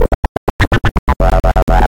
acid scratch loop - 1 bar - 125 bpm
remix of: 122596__alienistcog__acidscratch
extracted 1 bar mono loop at 125 bpm - distorted 303 phrase being scratched.
Works with a breakbeat or 4/4 kick, probably not big beat though.
Thanks to alienistcog for keeping it zero.
125, acid, bpm, breaks, loop, techno